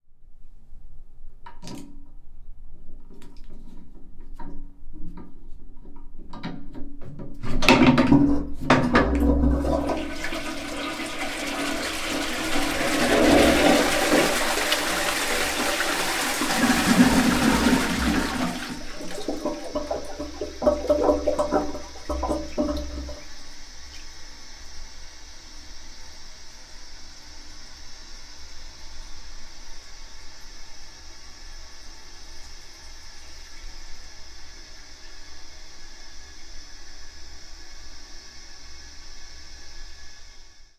Old Toilet Chain pull Flush
This is the flushing sound of a old chain pull toilet.
Chain, cistern, drain, empty, flush, flushing, gurgle, jet, liquid, Loo, Old, pressure, Pull, splash, toilet, toilette, water, wc